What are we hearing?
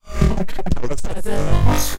think quik
weird, fx